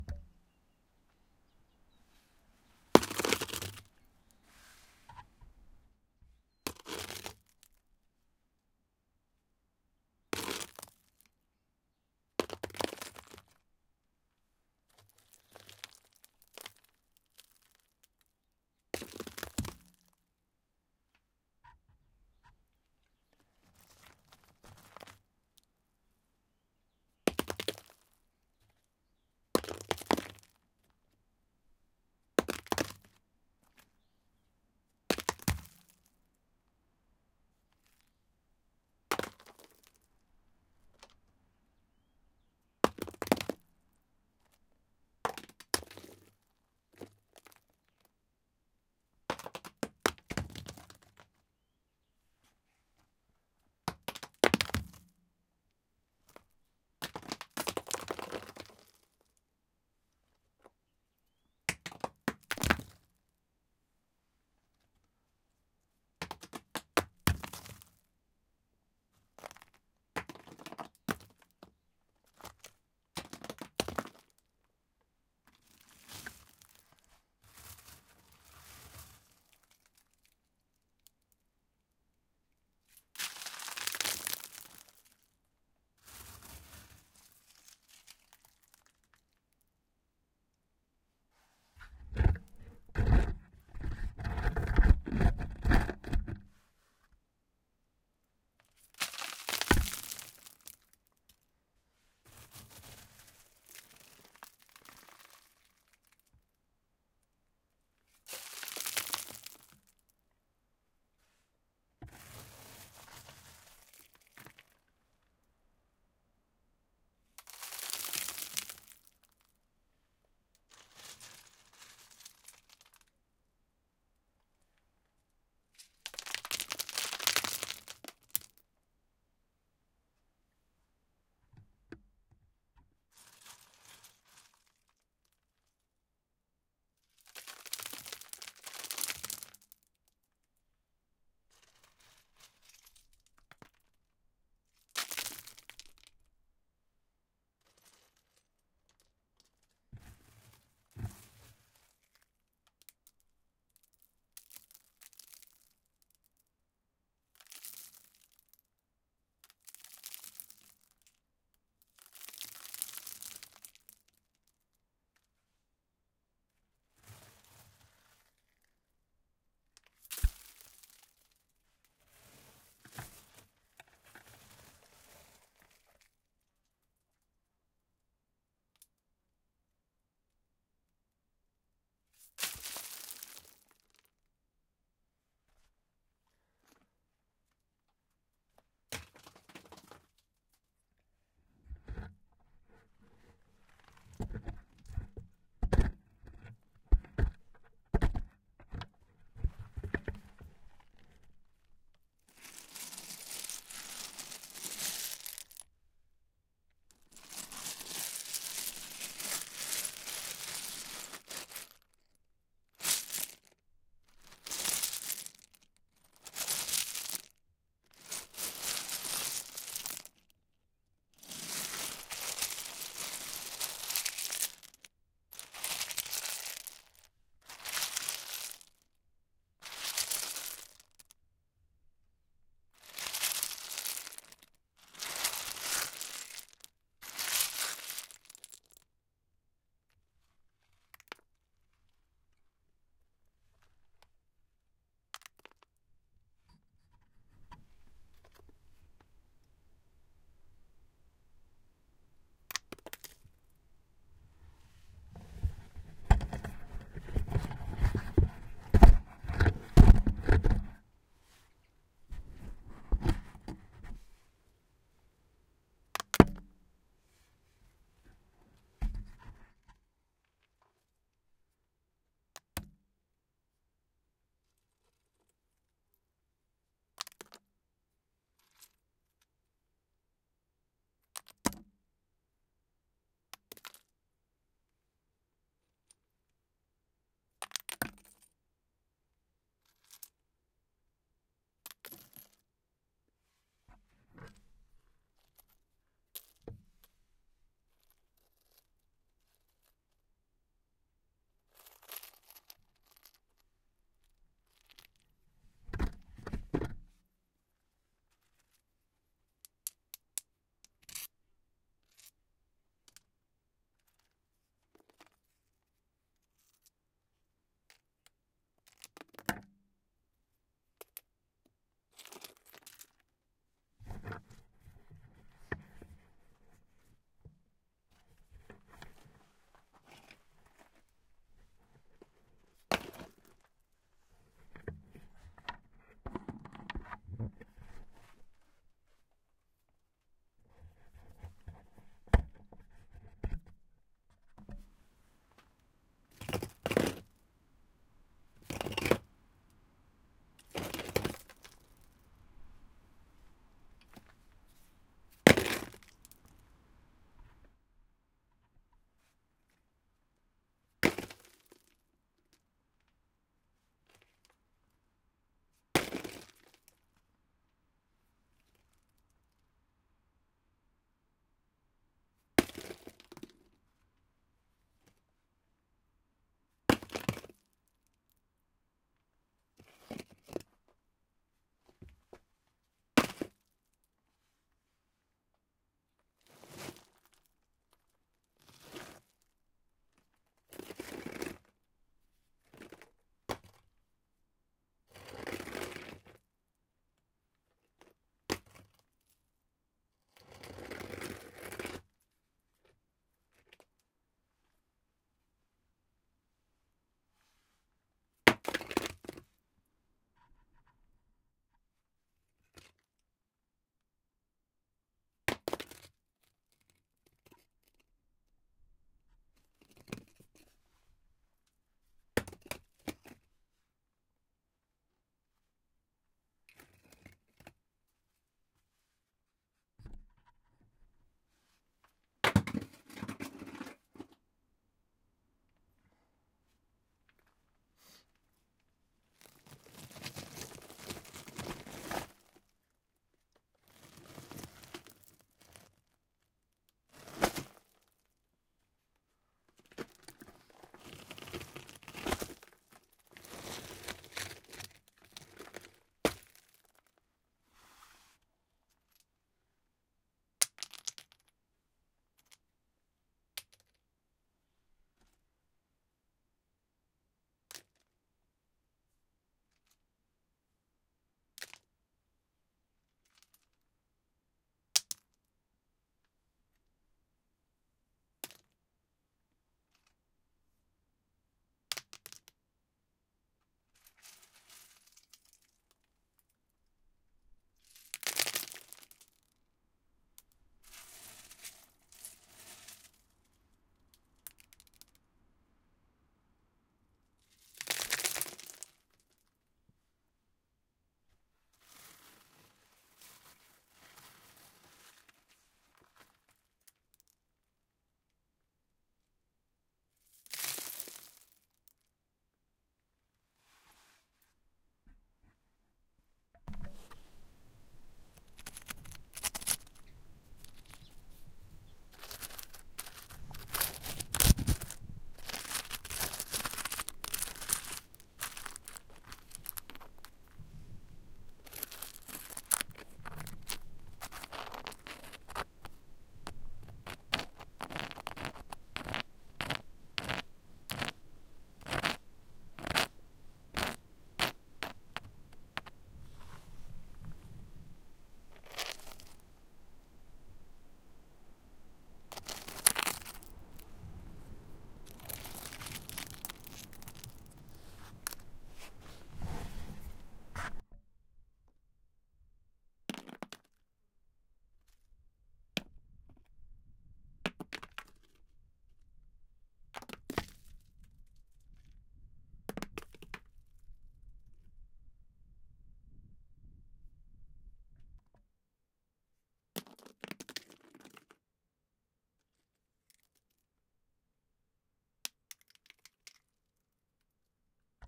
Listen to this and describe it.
Stones hit, rolled and scratched. Recorded with a Sony PCM-D50.
[NB: earlier commentary as "pan oscillation" was a mistake]